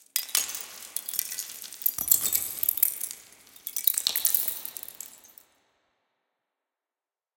Dropped, crushed egg shells. Processed with a little reverb and delay. Very low levels!
splinter, crackle, crush, crunch, eggshell, ice, drop